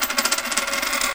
A short sample of coin twanging just before landing completely. You can loop and get an interesting sound.
Recorded by Sony Xperia C5305.
short, coin